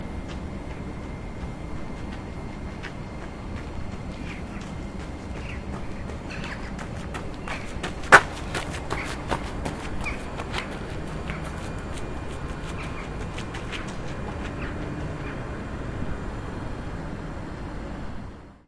hi-fi szczepin 01092013 runner on robotnicza street
01.09.2013: fieldrecording made during Hi-fi Szczepin. Performative sound workshop which I conducted for Contemporary Museum in Wroclaw. Sound of guy running across Robotnicza street in Wroclaw. Recording made by one of workshop participant.
cobbles, field-recording, Poland, Wroclaw